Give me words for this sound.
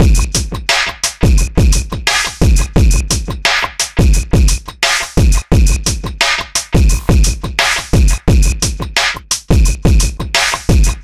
abstract-electrofunkbreakbeats 087bpm-rudebwoy
this pack contain some electrofunk breakbeats sequenced with various drum machines, further processing in editor, tempo (labeled with the file-name) range from 70 to 178 bpm. (acidized wave files)
beat, phad, distorsion, downtempo, downbeat